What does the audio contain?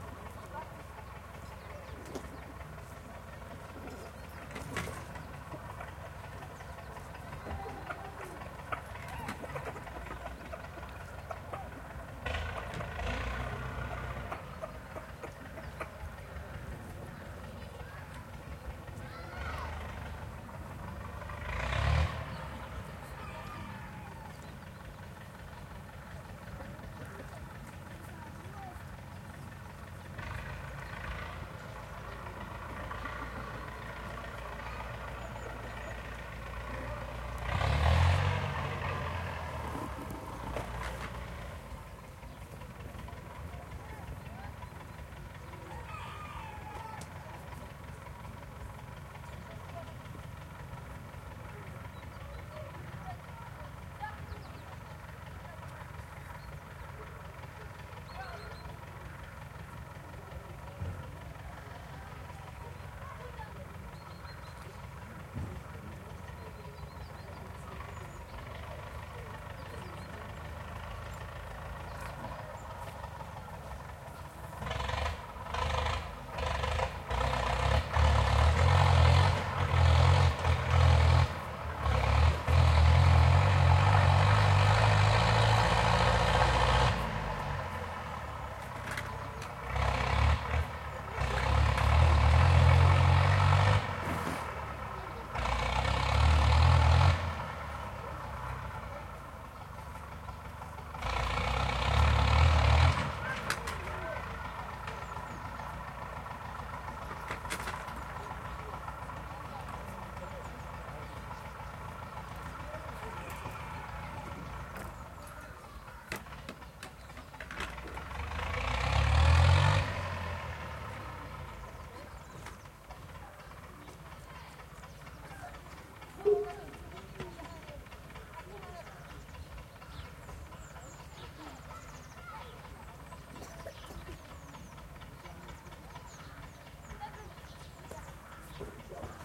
An early autumn morning in a settlement of Roma gypsies the Transsylvanian village of Țichindeal/Romania, basically just two mud roads with about 50 or so hovels in various states of disrepair.
The recorder is standing in the middle of the settlement, people are going about their business, some chickens can be heard. In the background, someone is harvesting walnuts by chaining a tractor to a tree and yanking at it.
Recorded with a Rode NT-SF1 and matrixed to stereo.